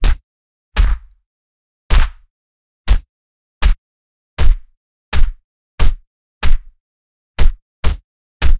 From ancient time to the present time, punching fists is the classic combat style against evil-doers!
(Recorded with Zoom H1, Mixed in Cakewalk by Bandlab)
Bass, Damage, Deep, Fight, Fighting, Impact, Kick, Punch